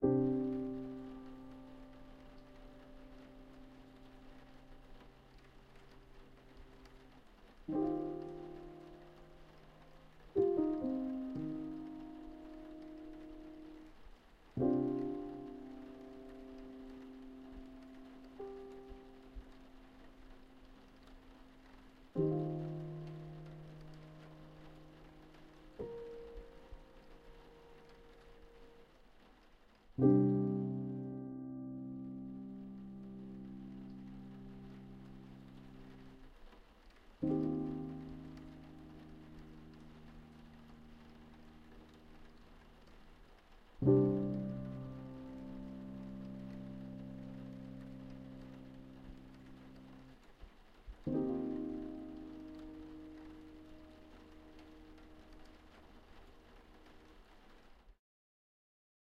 The sound of a solemn piano.
Piano, Music, Sad, Sorrow